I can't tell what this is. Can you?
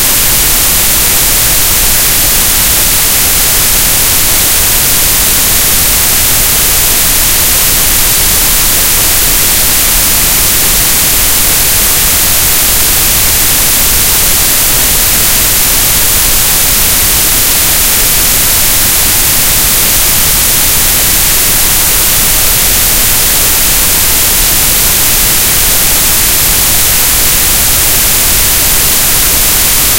TV-Static-Sound
Have a game? Need a static tv or radio sound? well here use this.